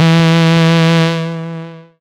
This sample is part of the "Basic saw wave 6" sample pack. It is a
multisample to import into your favorite sampler. It is a basic saw
waveform.There is a little low pass filtering on the sound. A little
delay makes the sound full. The highest pitches show some strange
aliasing pitch bending effects. In the sample pack there are 16 samples
evenly spread across 5 octaves (C1 till C6). The note in the sample
name (C, E or G#) does indicate the pitch of the sound. The sound was
created with a Theremin emulation ensemble from the user library of Reaktor. After that normalizing and fades were applied within Cubase SX.